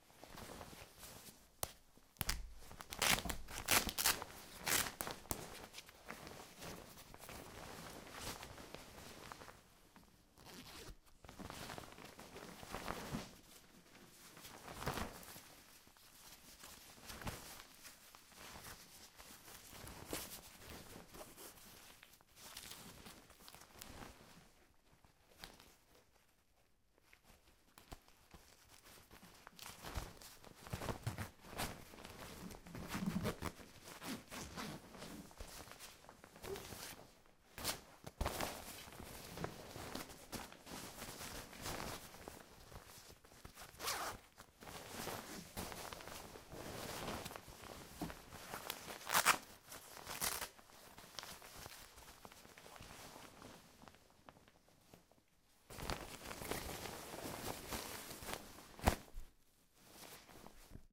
Touching and opening and closing zippers and buttons of a jacket for snowboarding. Recorded with a Zoom H2